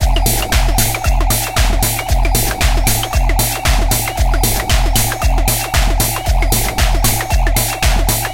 115 BPM STAB LOOP 11 mastered 16 bit
I have been creative with some samples I uploaded earlier. I took the 'STAB PACK 01' samples and loaded them into Battery 2 for some mangling. Afterwards I programmed some loops with these sounds within Cubase SX. I also added some more regular electronic drumsounds from the Micro Tonic VSTi.
Lot's of different plugins were used to change the sound in various
directions. Mastering was done in Wavelab using plugins from my TC
Powercore and Elemental Audio. All loops are 4 measures in 4/4 long and
have 115 bpm as tempo.
This is loop 11 of 33 with a dance groove in it and a high frequency experimental sound.
115bpm, dance, drumloop, electronic, loop, weird